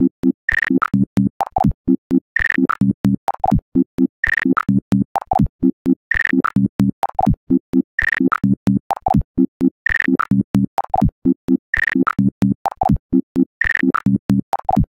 qqq-loop-128bpm
Created with FL Studio's "Gross Beat" plugin. Sounds good on a 4/4 beat, kinda circusy. 128 bpm.
128bpm, beeps, circus, gated, loop, synth